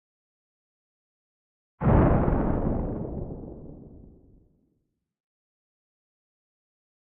Synthesized Thunder 01
Synthesized using a Korg microKorg
lightning, synthesis, thunder, weather